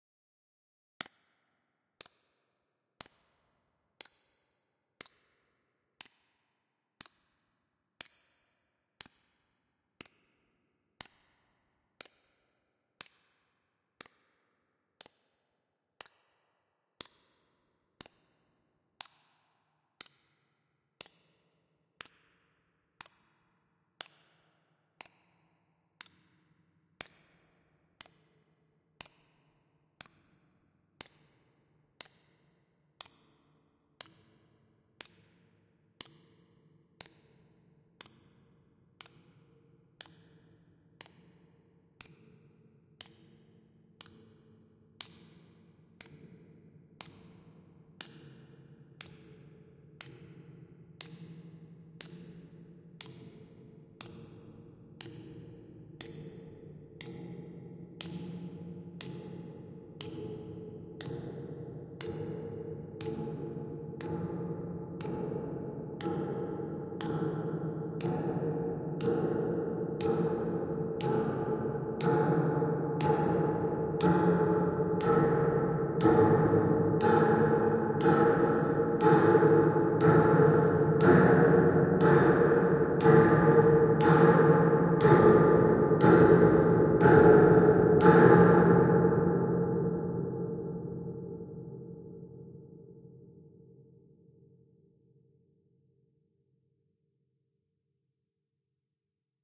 Clock Horror (One Shot)
fear, Eery, Sinister, Clock, Ambient, Slowly, Ticking, tick, Sounddesign, Swell, Spooky, slow, Creepy, Atmosphere, Horror, Terror, Scary, Dark, Evil